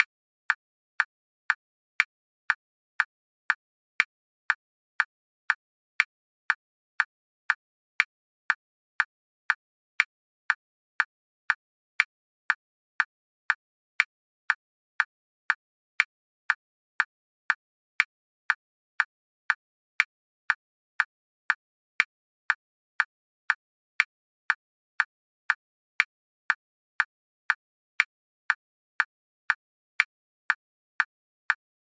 16 Bar Click Track
The 16-bar click track at 120.0 BPM, made in Audacity.
Sound ID is: 592193